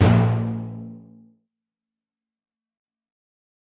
A clean HQ Timpani with nothing special. Not tuned. Have fun!!
No. 3.2 (it is a slight variaton to No.3